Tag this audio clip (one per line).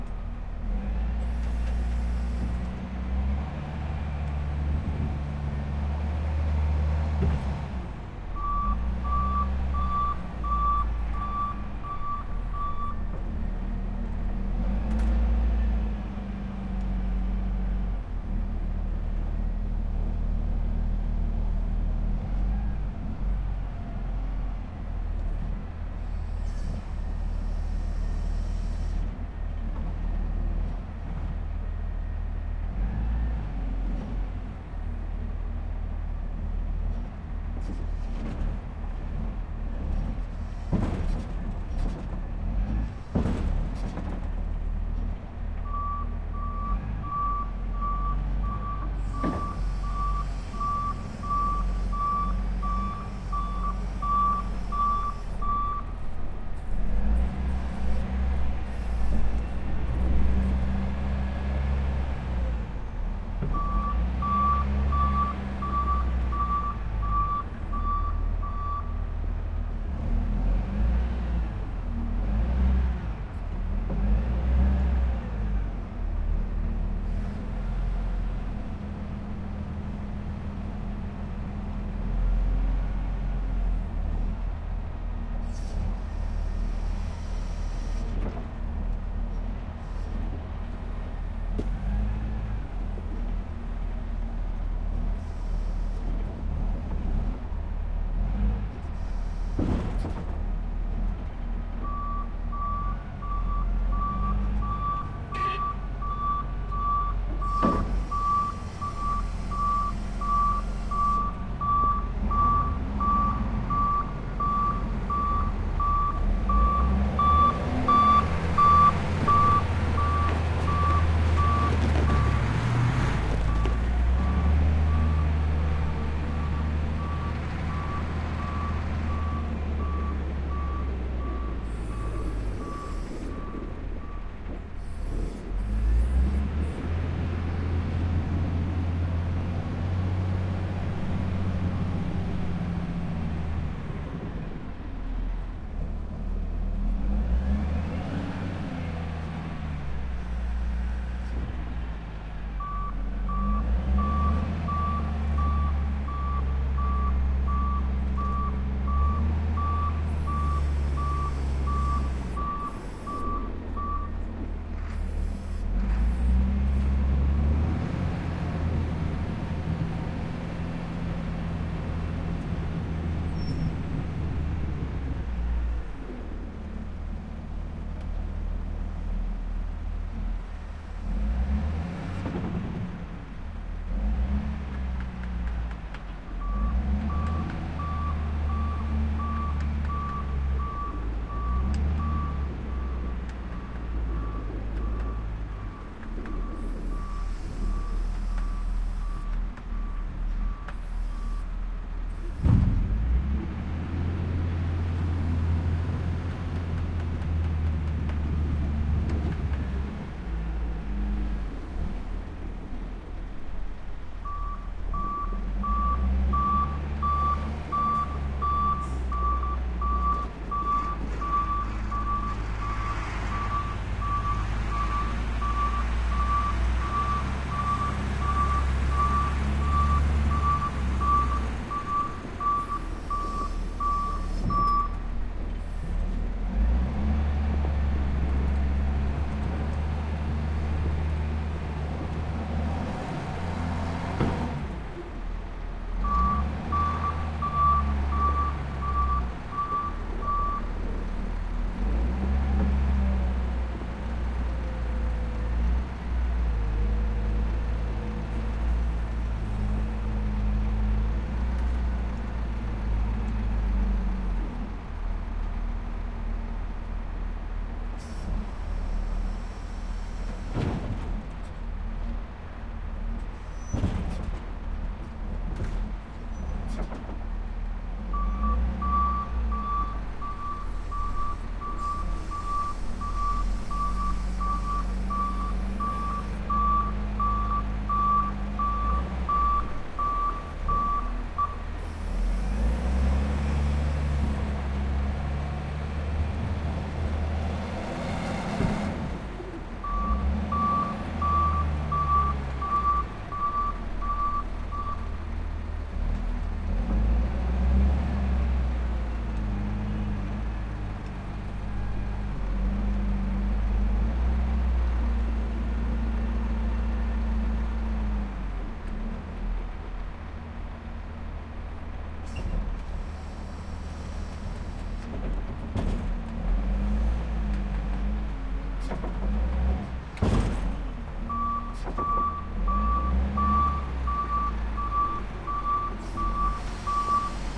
Machine
Machinery
Mechanical
bulldozer
caterpillar